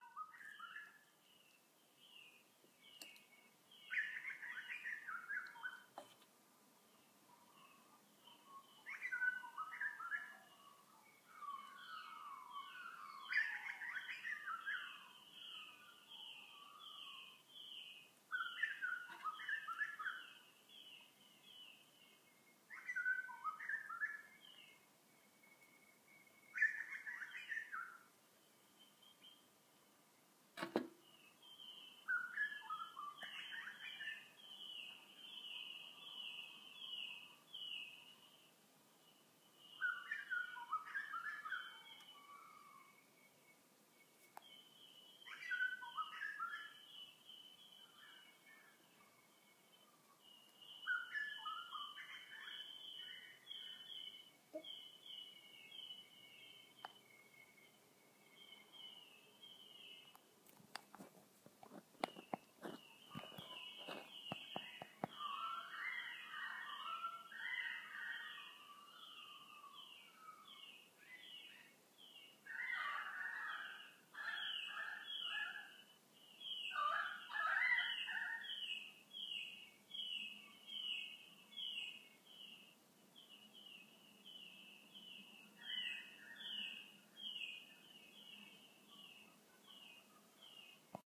A sound of bird tweets and chirps.
Bird, Chirp